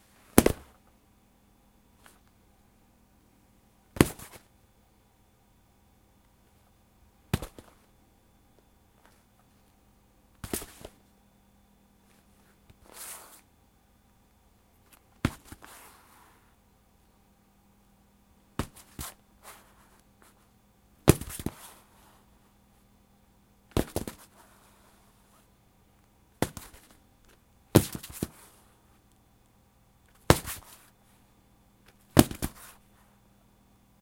body falls
Some thumps and thuds with a little bit of slide. Boxing targets getting smacked together.
Recorded with h4n, editing with Adobe Audition CC 2014